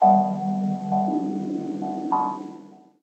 electronic bell sequence remix